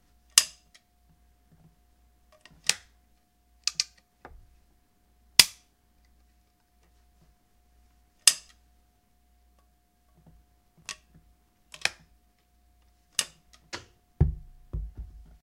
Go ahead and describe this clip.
Single Barreled Shotgun Loading
Sound of a single barreled break-action shot gun being opened, cocked, dry-fired, opened, loaded, then opened again ejecting the shell
barreled, shotgun, loaded, single